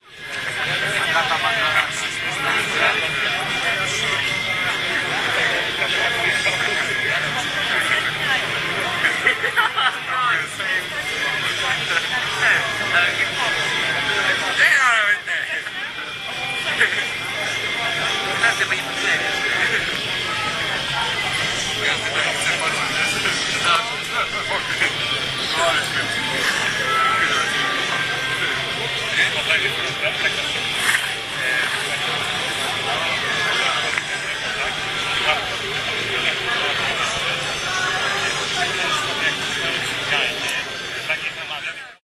people in tuczno310710
31.07.2010: about 23.00. open-air festival in Tuczno (is a town in Walcz County, West Pomeranian Voivodeship in northwestern Poland) organised by MISIETUPODOBA (artistic association from Poznan). sounds made by talking people, in the background some music. it was after an experimental electro live act.